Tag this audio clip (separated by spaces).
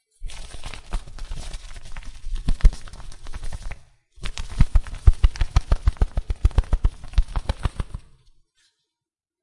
bird bug flap flapping foley insect sound-effect wing wings